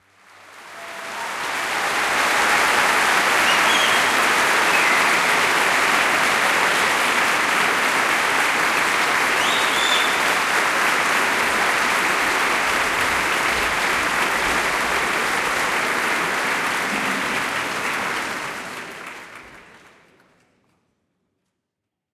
A pleased audience during a concert.